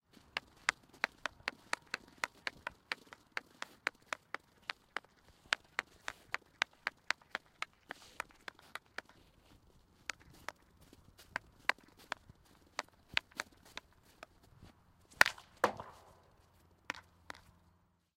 hockey ball dribble
Clean recording of a hockey ball that is being moved around by the stick.
dribble, handling, hockey, run, sport, sports